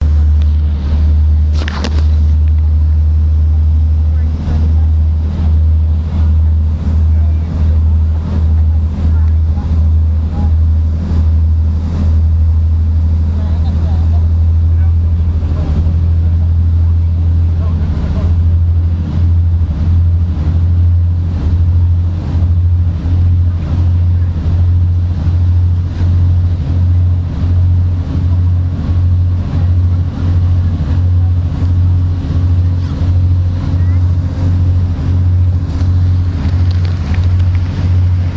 The sound of the Fitzroy Flyer boat motor en route to Fitzroy Island, off the coast of Cairns, 4 Oct 2018.
boat, engine, motor